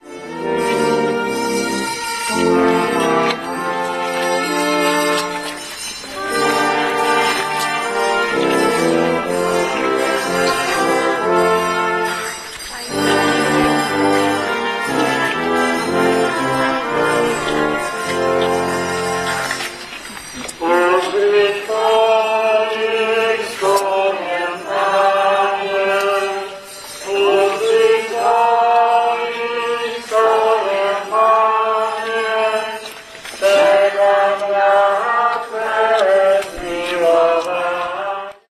03.06.2010: the Corpus Christi mass and procession in Wilda - one of the smallest district of the city of Poznan in Poland. The mass was in Maryi Krolowej (Mary the Queen) Church near of Wilda Market. The procession was passing through Wierzbiecice, Zupanskiego, Górna Wilda streets. I was there because of my friend Paul who come from UK and he is amazingly interested in local versions of living in Poznan.
more on: